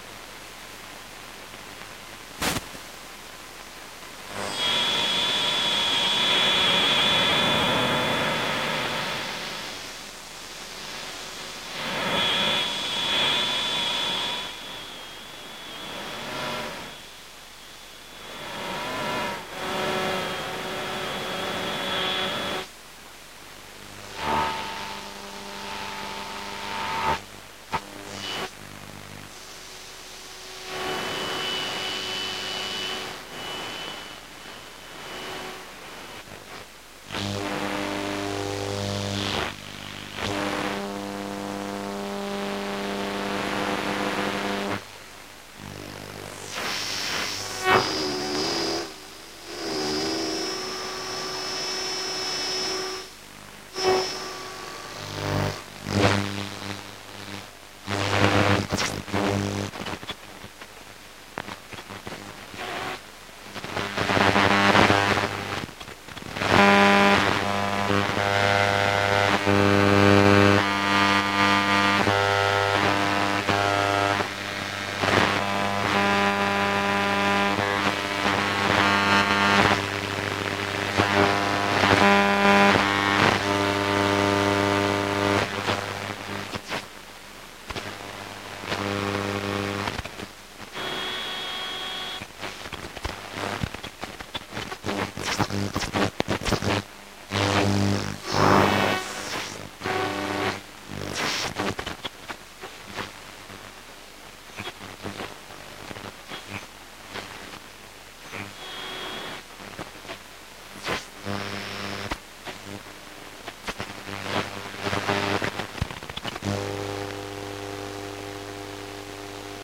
Rotating the tuning wheel of Panasonic RQ-A220 player/recorder/radio on August 18, 2015. AM mode.